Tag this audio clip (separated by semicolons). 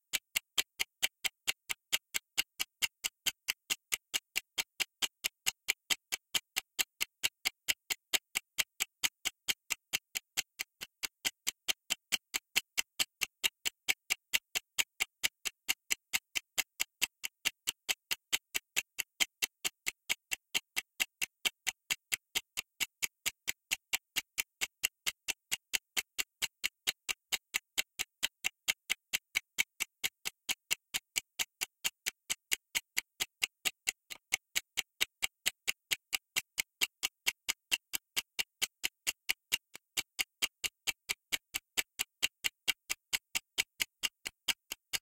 game; timer; tock; ticks; time; tick; paste; ticking; movie; tick-tock